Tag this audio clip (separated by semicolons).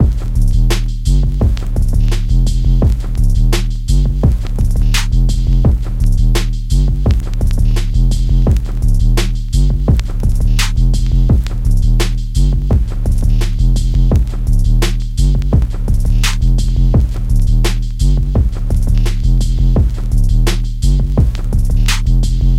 85bpm; loop